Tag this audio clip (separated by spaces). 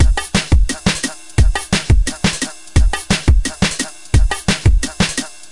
DnB Drums 174